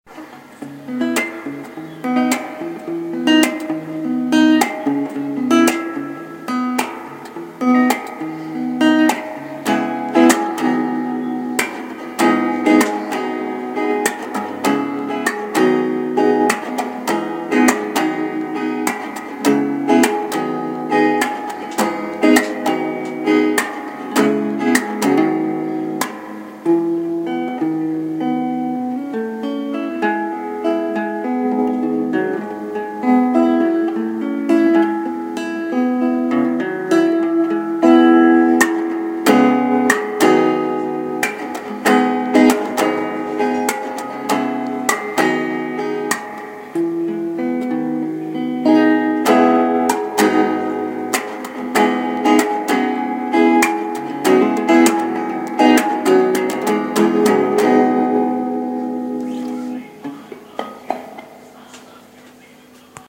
Random stringz
Uneek guitar experiments created by Andrew Thackray
Guitar, instrumental, strings